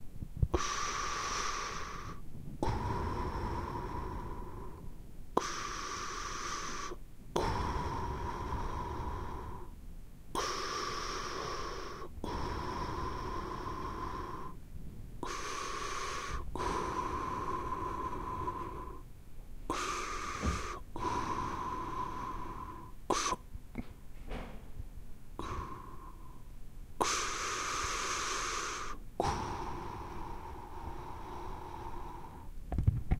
this is the sound of someone going under the water in an indoor pool. This was recorded in a tascam dr-40. This was edited on Reaper media.